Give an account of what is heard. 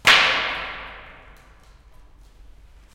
Wood Hit in Hall
A plank falling on the floor in a pretty big empty basement at our school (HKU - KMT, Hilversum, Netherlands). Recorded in Stereo (XY) with Rode NT4 in Zoom H4.